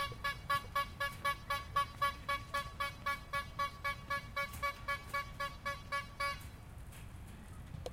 Day Clown Horn
Field recording of a clown horn at a park during the day.